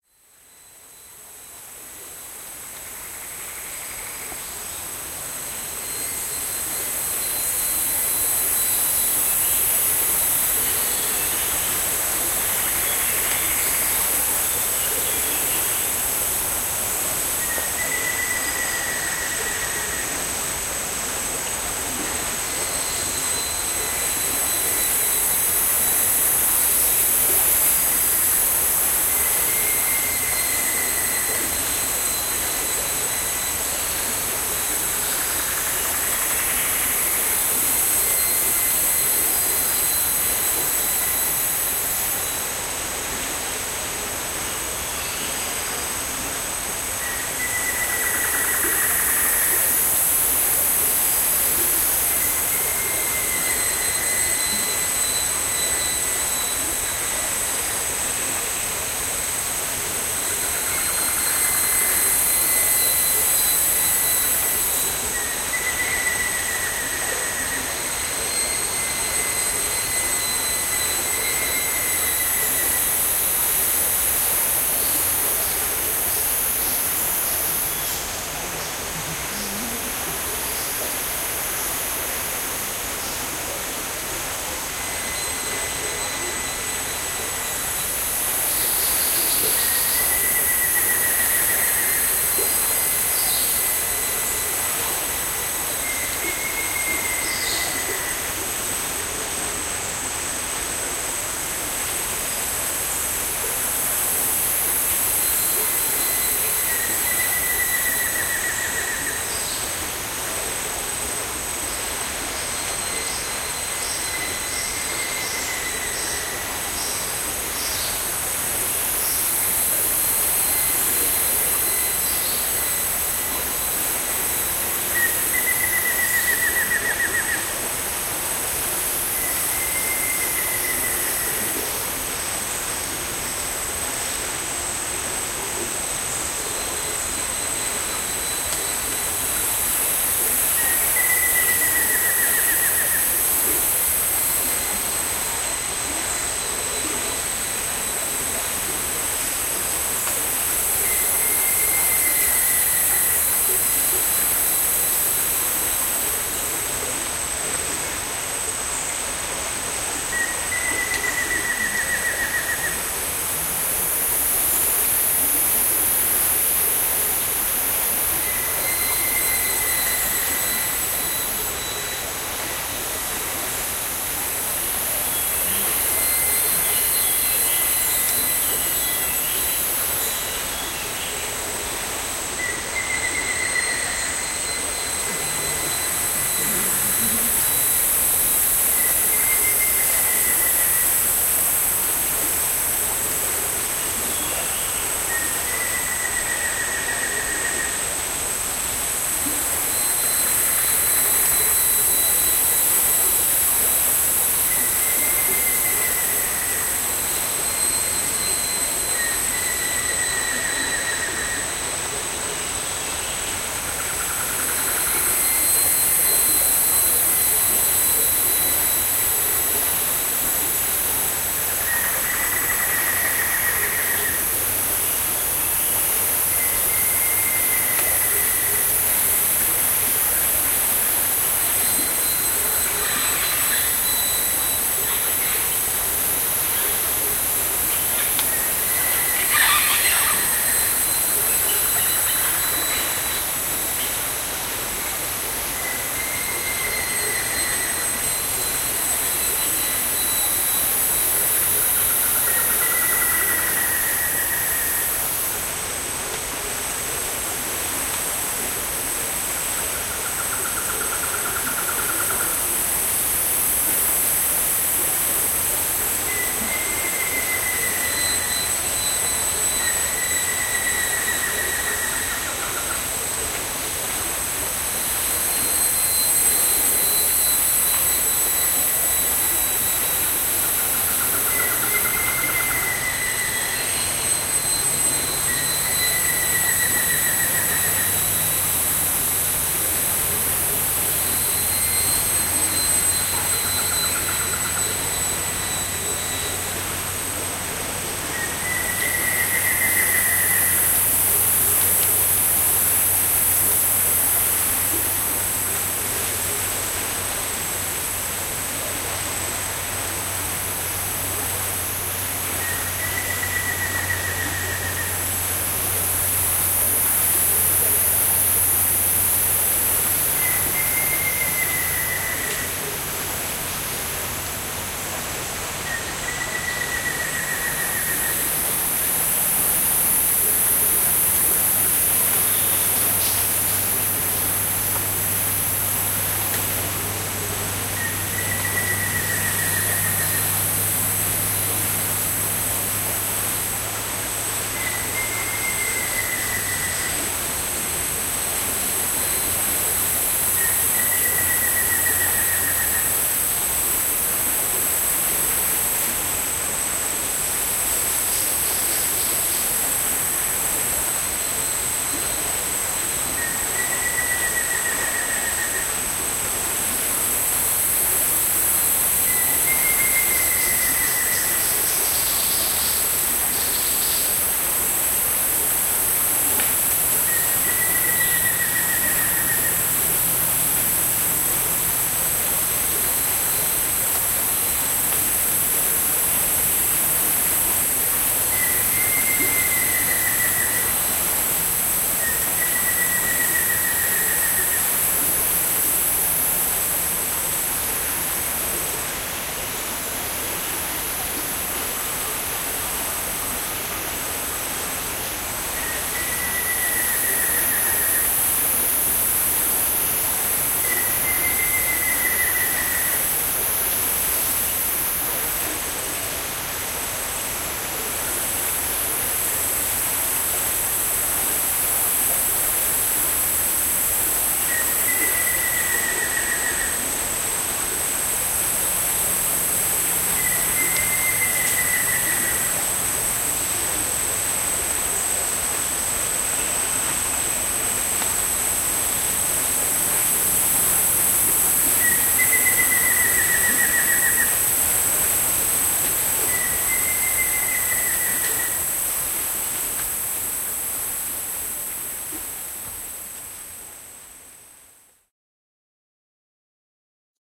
Jaguar Creek Belize
Ambient field-recording of the daytime nature sounds along Jaguar Creek, Belize.
mini-disc
field-recording, bush, Belize, trees, tropical, Central-America, nature-sounds, tropics, nature, rain, forest, Blue-hole, Maya, ambient